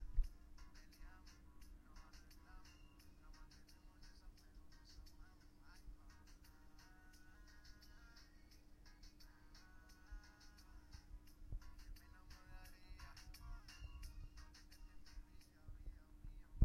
Music from headphones